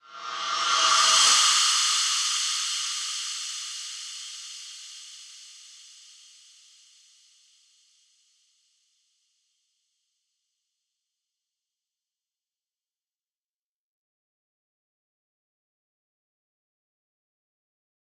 Rev Cymb 18
Reverse Cymbals
Digital Zero
cymbal, echo, metal, cymbals, reverse